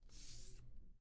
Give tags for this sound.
Rub Paper Sheet

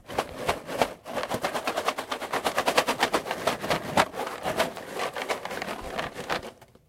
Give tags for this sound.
box rattling shaking

